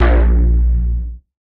Bass stab made in serum